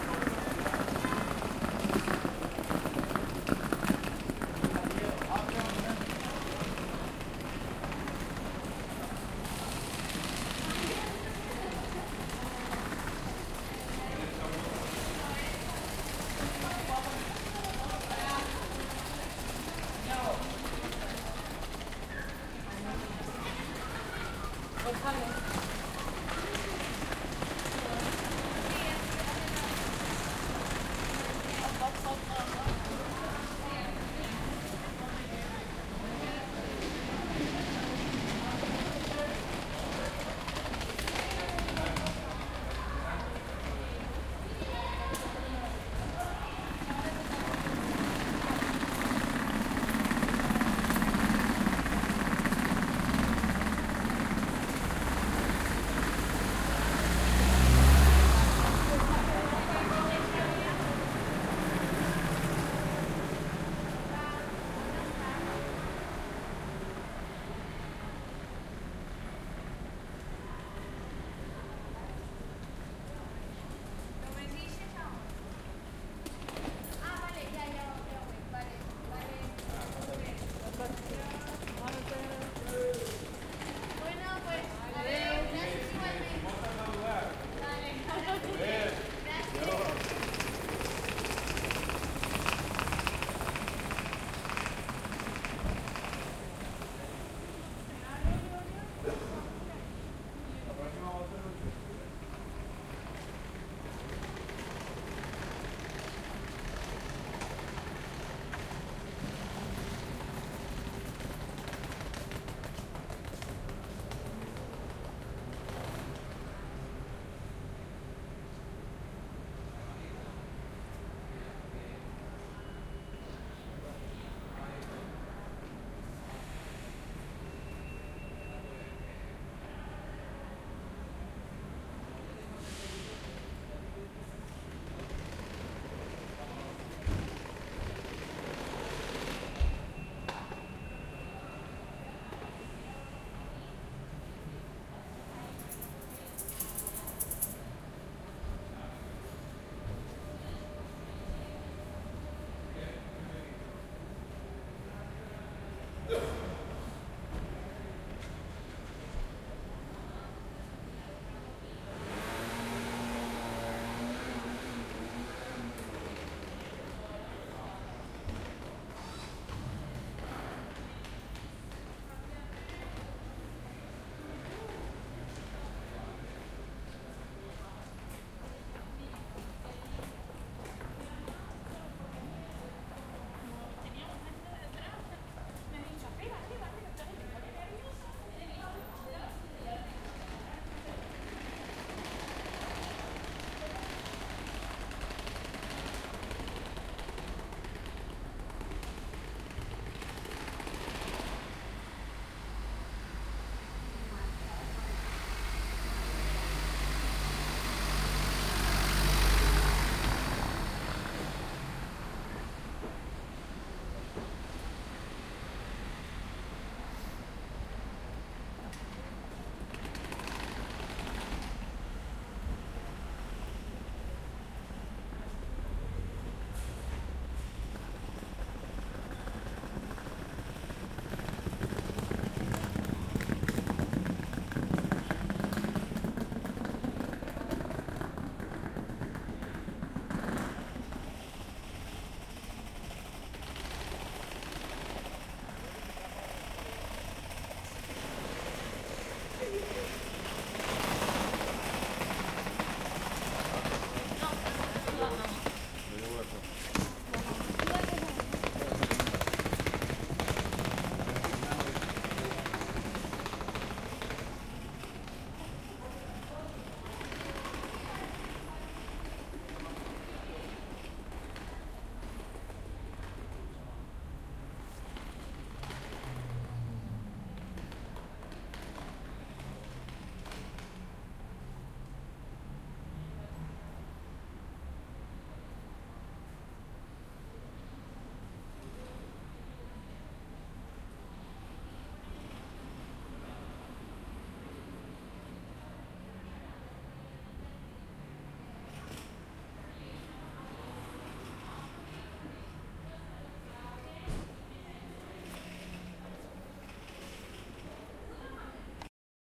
The following audios have been recorded at a bus and train station at Gandia (Valencia). They have been recorded late in the afternoon on the month of december.